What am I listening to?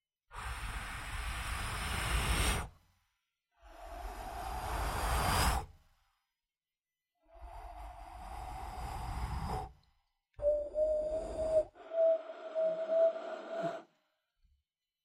Some blowing sounds created by my own mouth. Recorden on Blue Yeti.